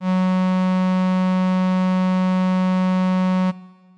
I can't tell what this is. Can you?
FM Strings Fs3
An analog-esque strings ensemble sound. This is the note F sharp of octave 3. (Created with AudioSauna, as always.)
pad, strings, synth